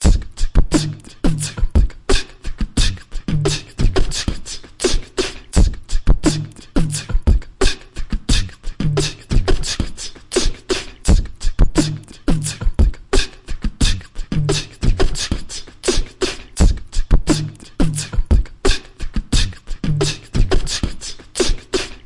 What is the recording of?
Beatbox loop at 87 BPM with effects
Recorded myself beatboxing at 87 beats per minute. I did three layers, then copied the whole thing, pitch shifted it and put it back in. Enjoy! Use for whatever you like.
This was just an experiment, so I didn't use a click track. Apologies for any timing issues.
vocal, 87-bpm, percussion, hiphop, beat, beatbox